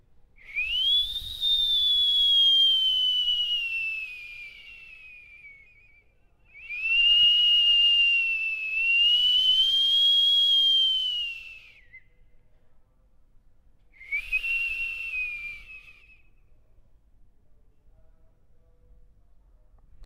Viento Silbido 2
Sound generated by the appearance of the air in outdoor. Hard intensity level.
scl-upf13, whistle, wind